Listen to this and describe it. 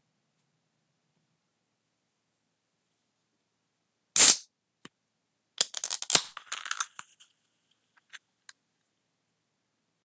Opening a soda can
soda-tab, opening-soda-can, pop-a-top